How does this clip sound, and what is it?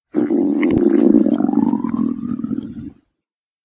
Stomach Rumble
accidentally recorded in the background when doing voiceover recording